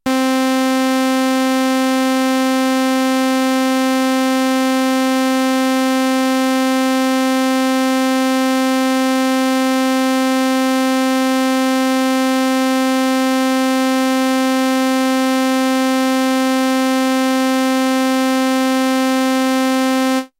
Mopho Dave Smith Instruments Basic Wave Sample - SAW C3
basic, dave, instruments, mopho, sample, smith, wave